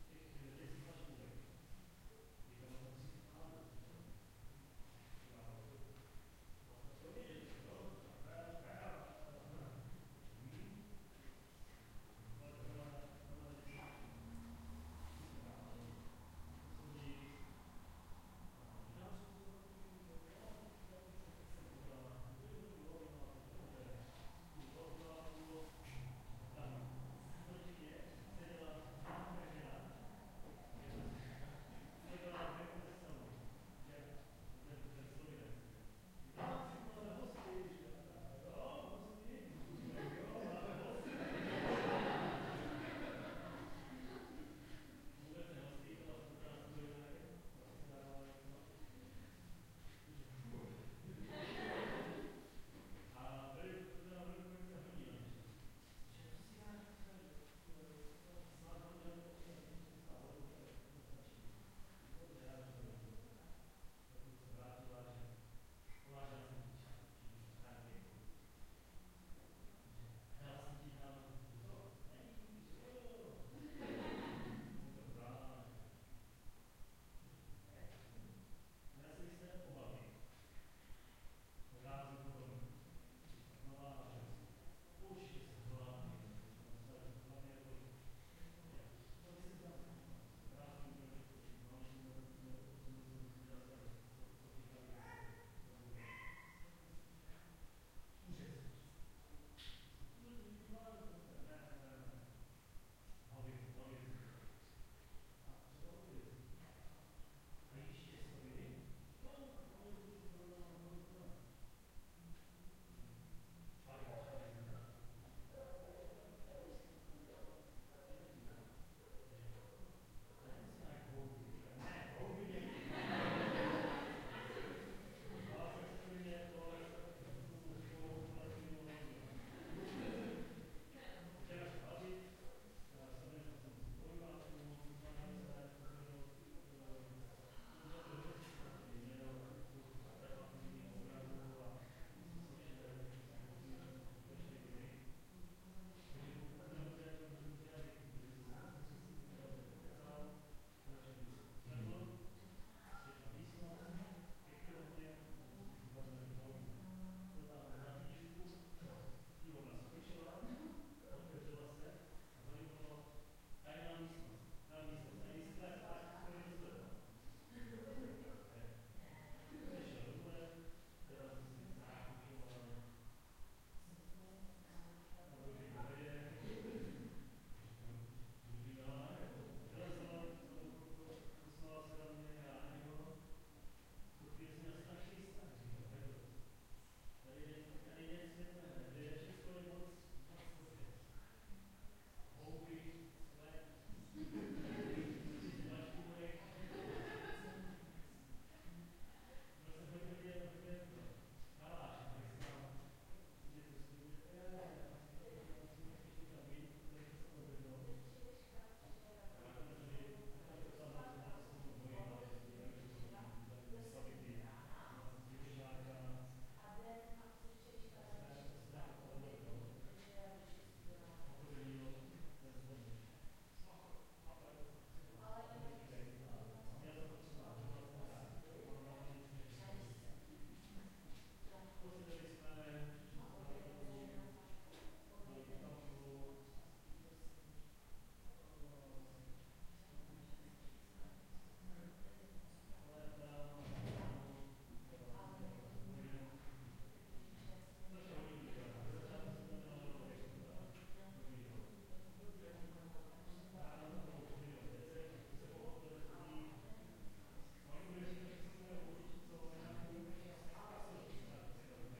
Hallway 2(people chatting in another room, voices)
Hallway ambience with people talking in the background.
chatting, hallway, indistinct, interior, muffled, people, room, talking, voices